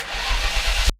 Ignition Maserati
automobile, car, engine, ignition, sports, vehicle